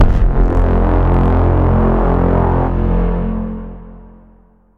inception-stab-l
I just took the left channel and normalised it.
coursera, inception, mooc, wham